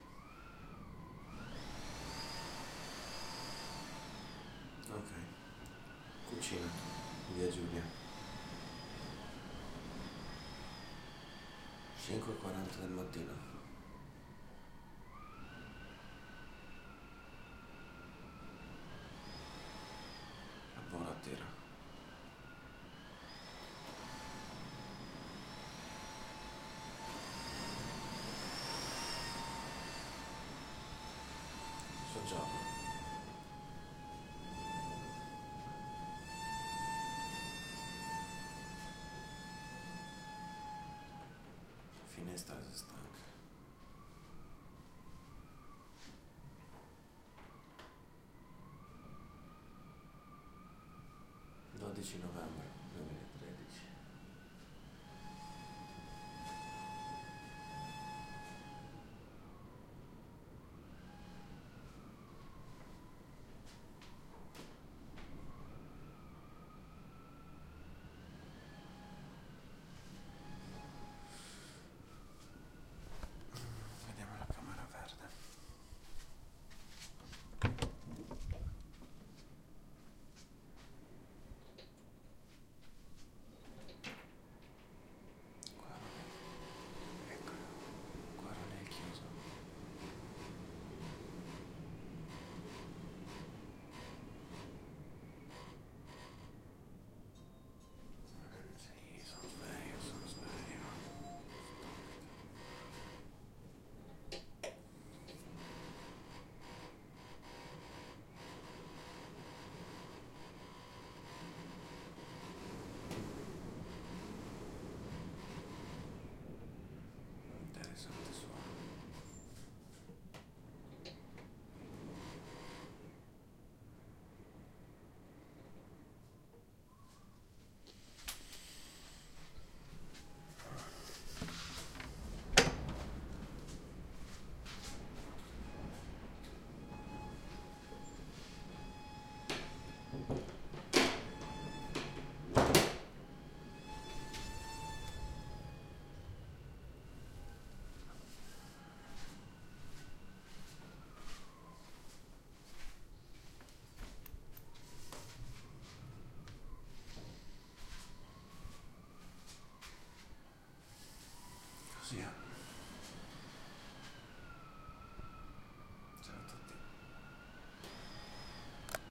131100 via giulia Bora
Sorry for my voice... i record this sound inside my flat at 5.45 am during the strong wind in trieste called bora. my window is not very good and you can feel the hiss..
44.100 16 bit with zoom h2
wind, acoustic, zoom, hiss, bora, h2, real, indoor, whistle